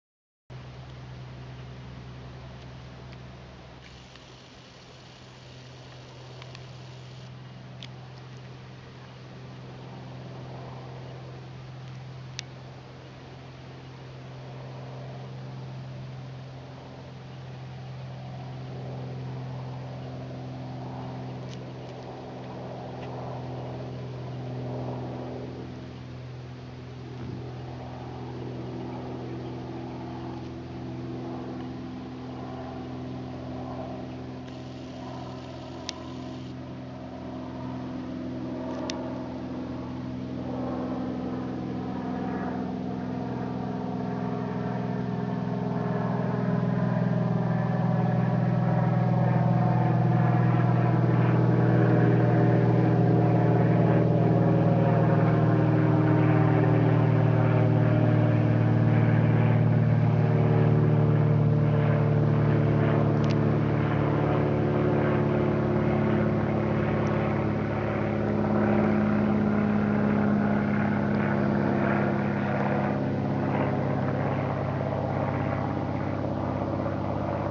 Unedited field recordings of a light aircraft flying overhead in clear and calm conditions, evocative of summer days. Recorded using the video function of my Panasonic Lumix camera and extracted with AoA Audio Extractor.